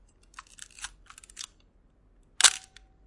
Nikon FM2 a
Loading and Shooting a 1982 Nikon FM2
Nikon, Click, Photography, Camera